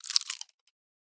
candy wrapper bite A
biting into a plastic candy wrapper. mmmm good!
candy, bite, wrapper